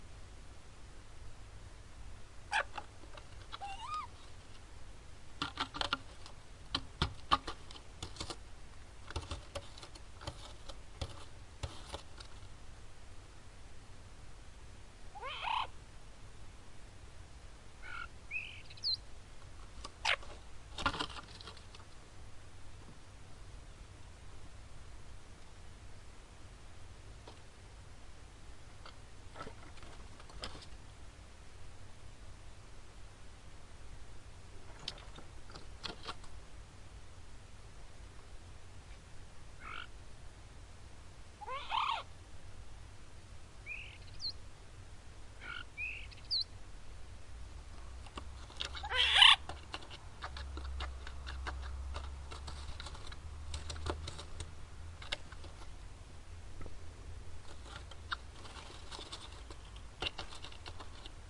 Sounds of a starling that has moved in our ventage :D